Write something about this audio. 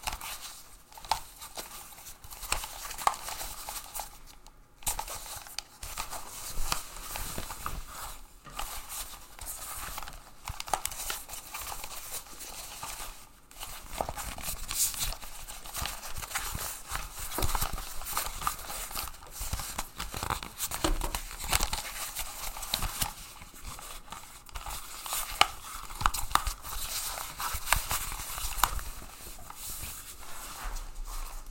This is scuffling or rubbing something across the floor or paper or whatever I used....been a year since I recorded this. Hopefully someone finds this useful in some weird way.
tile, scuffling, floor